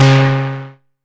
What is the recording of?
Here's a heap of snapshot samples of the Synare 3, a vintage analog drum synth circa 1980. They were recorded through an Avalon U5 and mackie mixer, and are completely dry. Theres percussion and alot of synth type sounds.
analog, drum-synth, percussion, Synare, vintage